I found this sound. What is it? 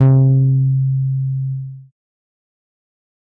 Another Psy Goa trance base sample pack. The fist sample is just a spacer.I think it starts at E1. I have never seen a set of Psy base samples on the net, thought I'd put them up. if anyone has a set of sampled bass for Psy / Goa available, please tell me, I'm still learning, so these are surly not as good quality as they could be! Have fun exploring inner space!
goa, base, psy, electronic, trance, sub, sample